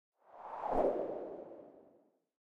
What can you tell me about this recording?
turn house
wind, spin, woosh